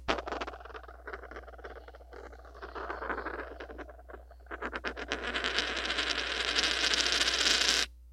coin twirl 1
Spinning a coin (a quarter) on a wood floor next to a contact mic taped to the floor. Recorded with Cold Gold contact mic into Zoom H4.
coin, contact, floor, metal, spin, twirl, wiggle, wood